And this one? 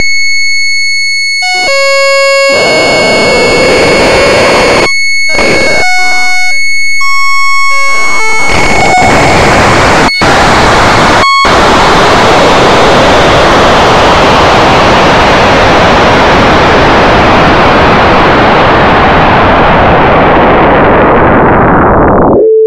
mixture of chaos parts and A and harmonics of A resonance, becoming more muffled.
made from 2 sine oscillator frequency modulating each other and some variable controls.
programmed in ChucK programming language.